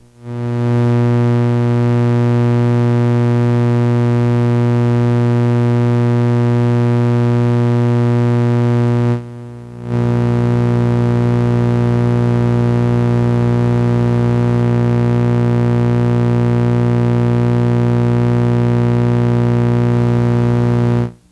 Various sources of electromagnetic interference recorded with old magnetic telephone headset recorder and Olympus DS-40, converted and edited in Wavosaur. Drill battery charging.
electromagnetic, noise